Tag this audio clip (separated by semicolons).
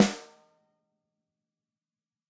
snare; dry; drum; real; stereo; instrument; multi; velocity